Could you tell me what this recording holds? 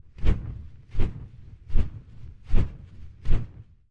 Large Wings Flapping - Foley
Meant to sound like a winged beast flapping its wings
flap, wings, beating